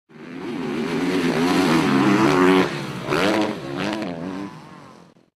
2-250FHonda-4strokes-jumping
250f honda motorcycle going off jump.
250f, motorcycle, motorbike, honda